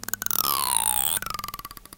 The wonderful sound made by running a finger along a comb